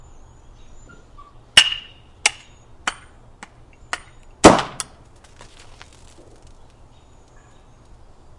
champagne saber
This is the sound of knocking the cork out of a bottle of champagne with a saber (after a few practice hacks that hit the glass rim of the bottle). Recorded with a boom microphone onto a P2 card via a Panasonic HVX200 digital video camera.
saber, hacking, champagne, cork